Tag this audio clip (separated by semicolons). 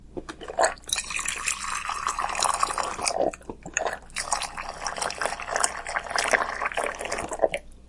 electric; hot-water